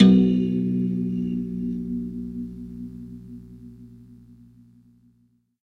String 5 of an old beat up found in my closet.